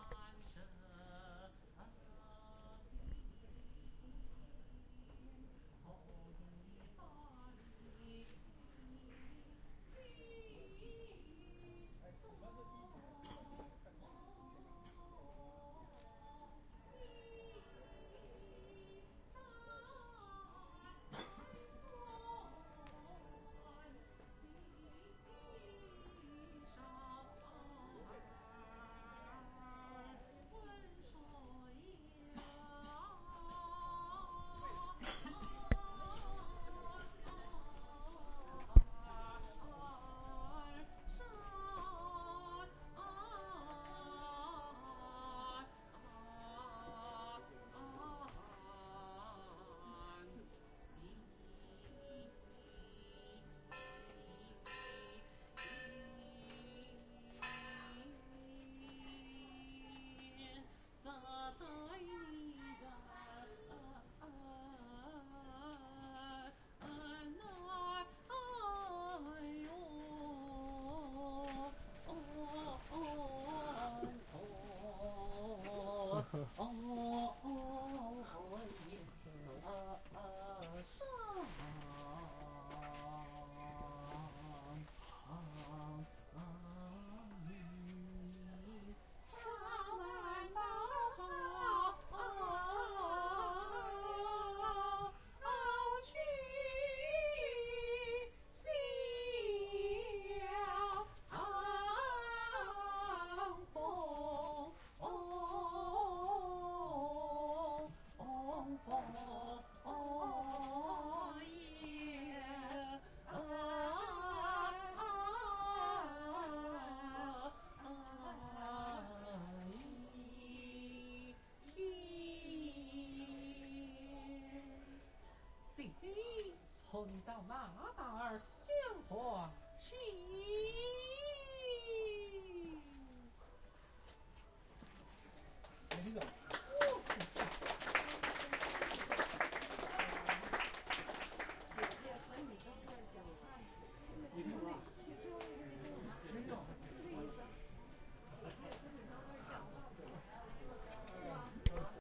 chinese music singing02
chinese traditional music recorded in Suzhou
concert, live, music, singing, traditional, vocal, voice